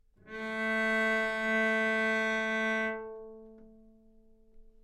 Cello - A3 - other
Part of the Good-sounds dataset of monophonic instrumental sounds.
instrument::cello
note::A
octave::3
midi note::45
good-sounds-id::451
dynamic_level::mf
Recorded for experimental purposes
cello, single-note, multisample, neumann-U87, A3, good-sounds